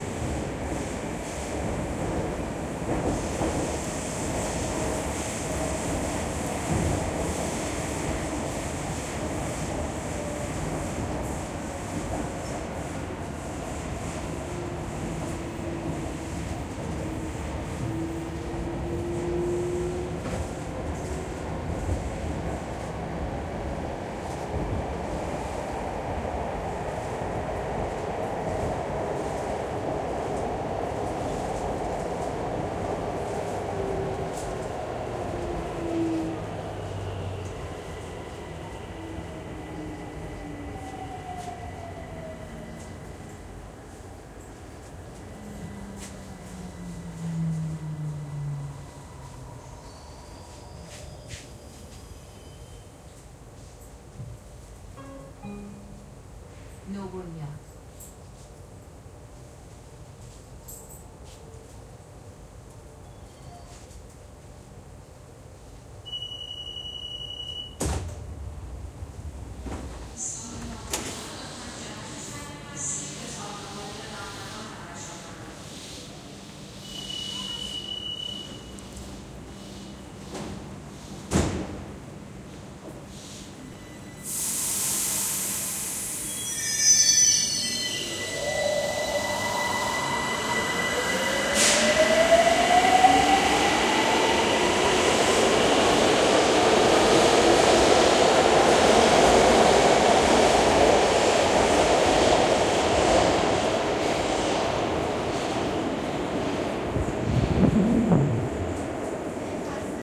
Tehran Metro 2017-01-18
Begins from inside the train wagon, then I get off the train.
Recording date and time: 2017-01-18 22:20 IRST
Recording Device: Stereo microphones on Blackberry DTEK-50 Smartphone
Binaural, City, Field-recording, Iran, Metro, Ride, station, Stereo, Subway, Tehran, Trains, Transport, Urban